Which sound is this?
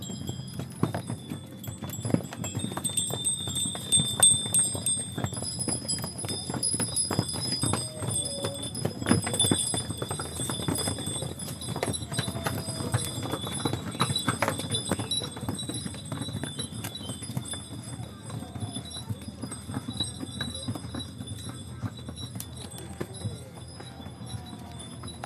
Santorini donkey bells
A group of donkeys being urged up a hill on the Greek Island of Santorini in October 2011. We walked from the village to the shore along this steep path covered in donkey shit. Seriously, if you ever visit there, don't do that.
bells, donkey, field-recordings, Santorini